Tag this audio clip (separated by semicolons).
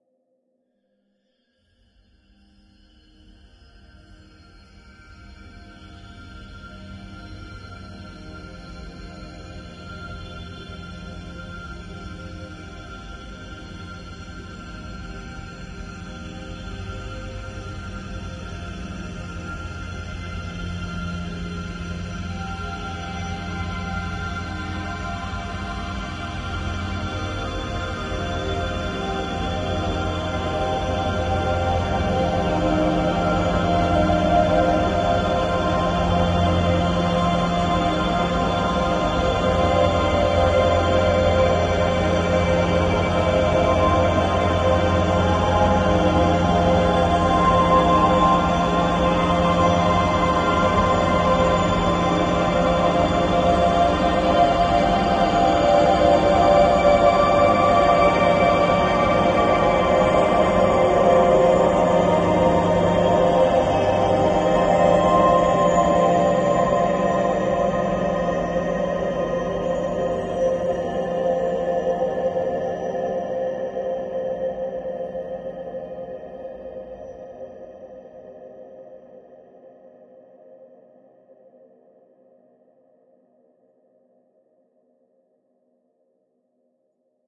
ambient; artificial; smooth